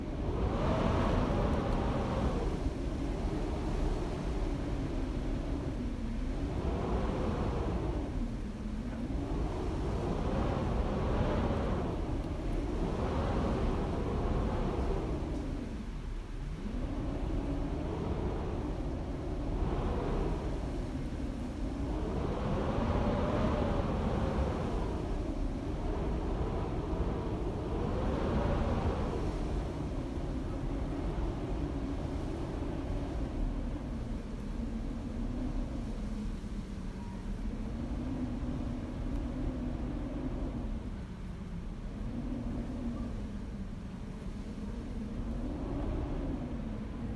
wind draft loop 2
An indoor recording of a strong wind/draft blowing through the window/door gaps, edited to loop seamlessly.
air, airflow, ambience, blow, current, door, draft, gap, gust, home, house, household, loop, nature, storm, strong, weather, wind, window